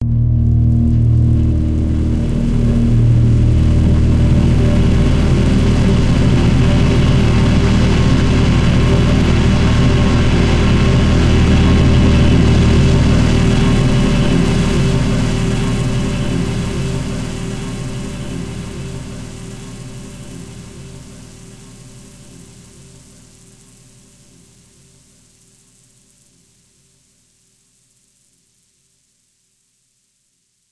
"Alone at Night" is a multisampled pad that you can load in your favorite sampler. This sound was created using both natural recordings and granular synthesis to create a deeply textured soundscape. Each file name includes the correct root note to use when imported into a sampler.
synth, multisample, tremolo, pad, strings, ambient, granular, rain